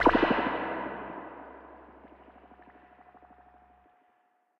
Acid one-shot created by remixing the sounds of
acid one-shot tb 303 synth